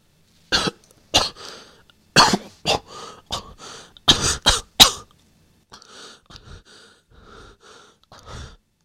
Breathless Coughing 1
Breathless Coughing: a young adult male coughing and panting weakly after being choked.
This was originally recorded for use in my own project but I have no issues with sharing it.
breathe, breathless, choking, cough, coughing, human, male, man, pant, panting, reaction, vocal, voice